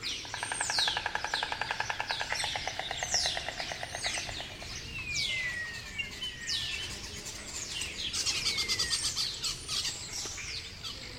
20060426.house.storks.02
sound of storks nesting near a house in the marshes. Sennheiser ME62 into iRiver H120. Doñana National Park /sonido de cigüeñas cerca de una casa en las marismas
insects, storks, field-recording, birds, pond